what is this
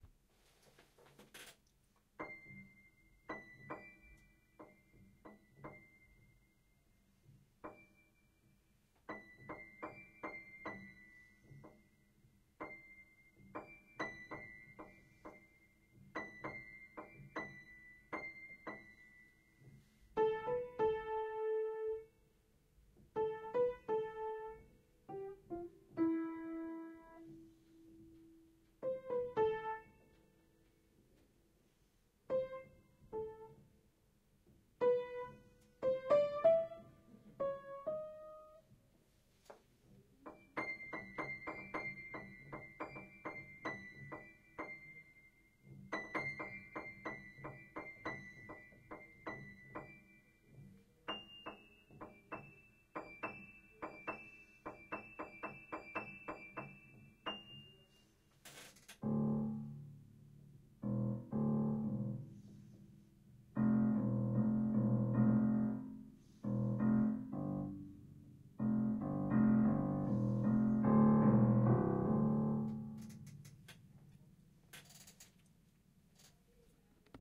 Me hitting random keys on a piano